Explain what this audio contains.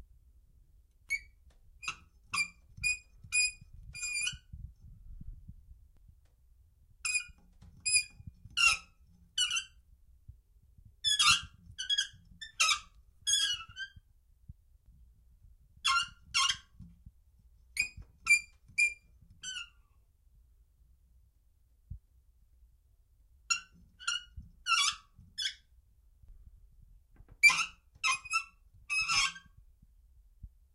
squeaky valve
a shower valve turned on - water turned off. It made a nice squeak.
SonyMD (MZ-N707)